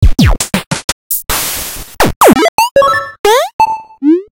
Po-33 Drum kit Arcade
(The Po-33 splits one sound file into a kit of 16 sounds. Hence why a sample pack like this is appreciated)
This one on the themes of 8bit/ arcade.
Processing was done to set : make the first kick beefier, normalized. And volume curve adjusted on a sample.
Hope you enjoy :)
8bit, samplepack, video-game, percussion, kit, arcade, chiptunes, po20, Pocket, po-20, drum, po33, po-33, Operator